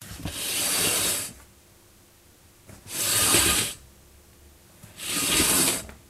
A sound effect of a scrape noise
noise, scrape, scraping, scratching